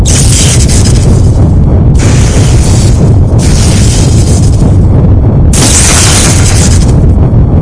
Engine damaged sparks
A low throbbing rumble with stereo sparks and shorts perfect for your damaged starship.
sci-fi, damage, engine, spaceship, sparks